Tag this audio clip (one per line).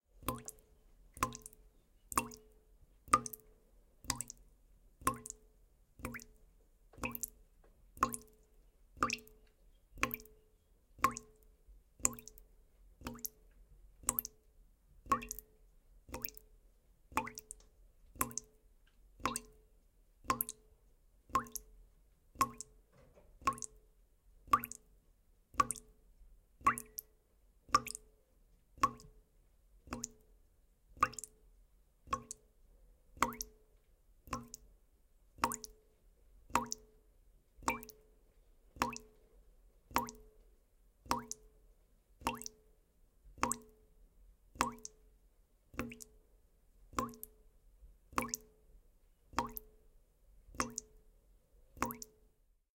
water
tap
drip
sink
liquid
dripping